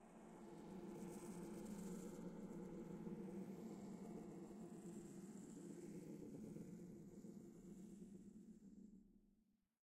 FIDGET SPINNER TABLE WITH PIEZZOS 2
FIDGETSPINNER, PIEZZOS, TABLE